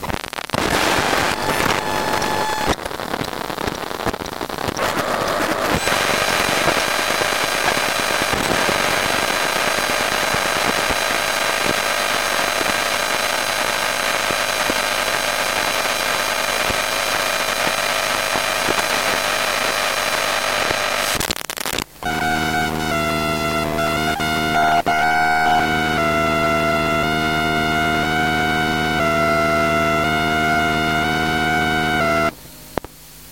macbook sleeping mode
recorded with induction coil